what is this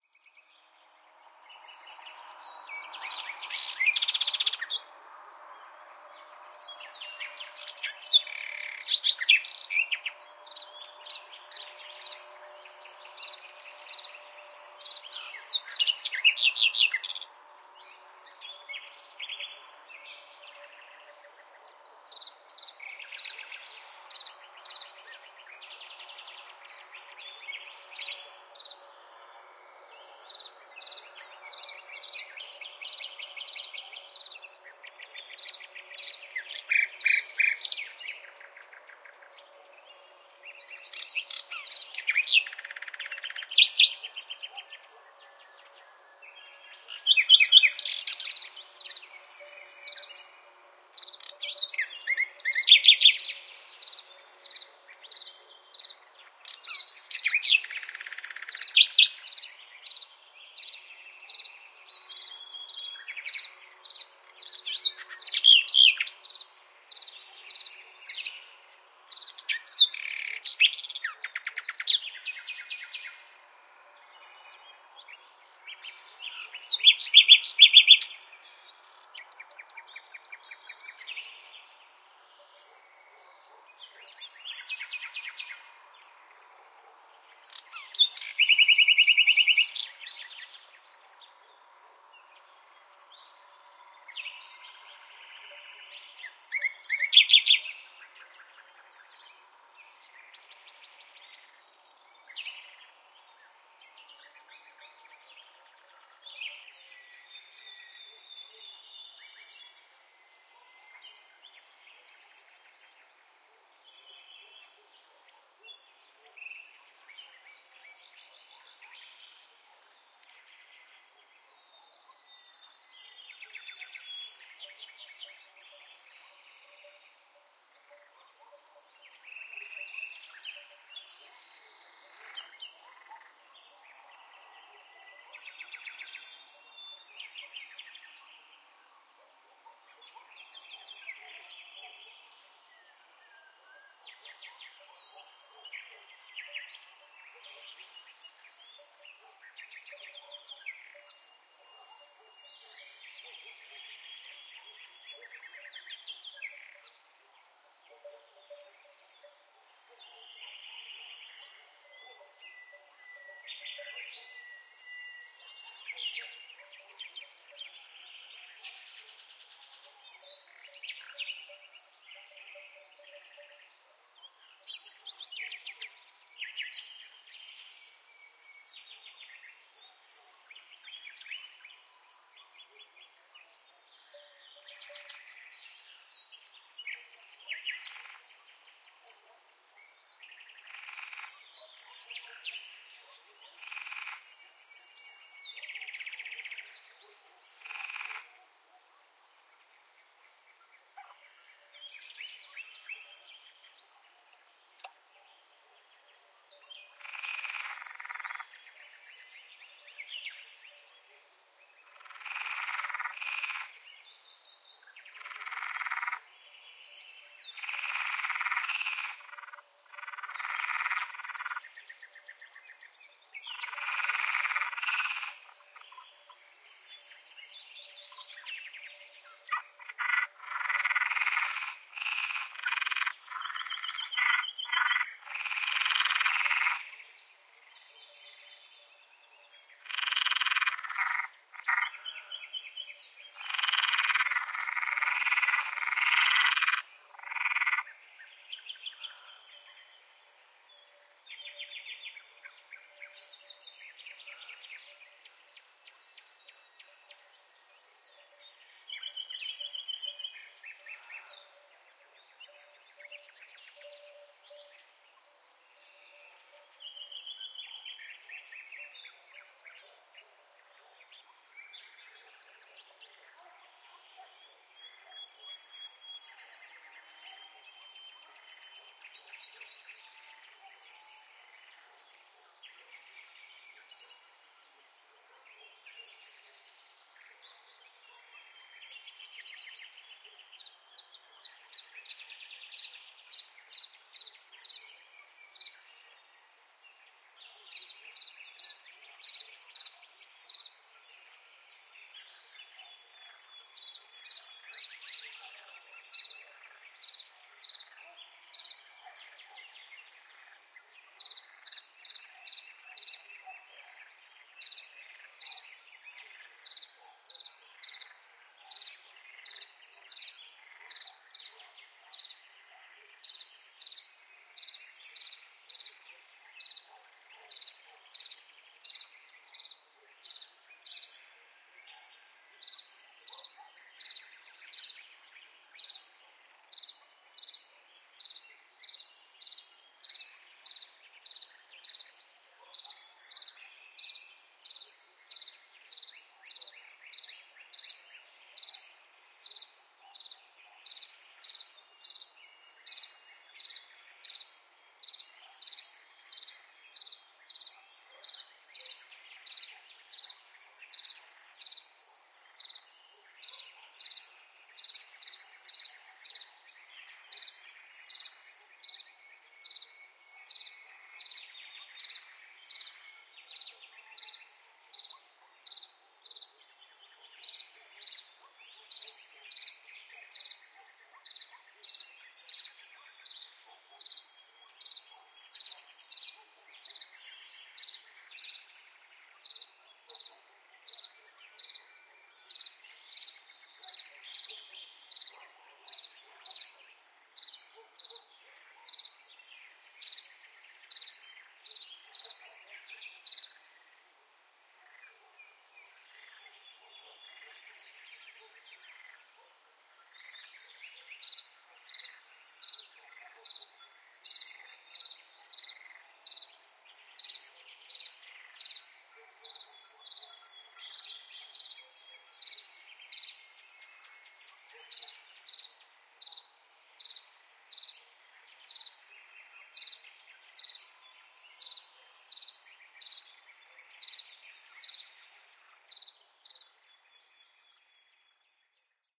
At 04 am with mkh20 on parabola and 2xmkh60 ortf, rana perezi, luscinia megarhincos and crickets.